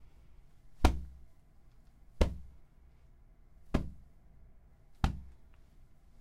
43 Ninho golpeado
audio de foley para animation